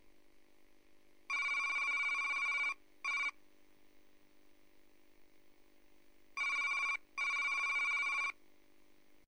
DIGITAL TYPING
A computer beeping sound as it types.
sound, type, digital, beeps, old, army, computer, typing, fx, beep